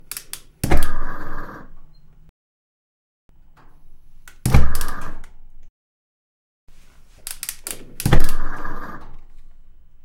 Try to ignition of gas on the kitchen using piezoelectric lighter. Gas explosion. Three variants. There using old soviet gas-cooker.
Mic: Pro Audio VT-7
ADC: M-Audio Fast Track Ultra 8R